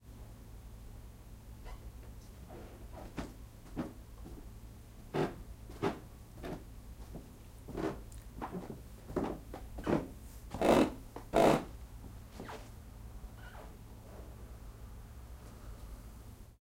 talking up a flight of wooden stairs, towards the recorder